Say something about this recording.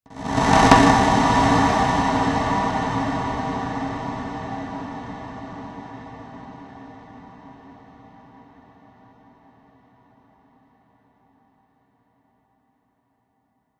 multi echo 4
a dark, distorted and echoed percussion sound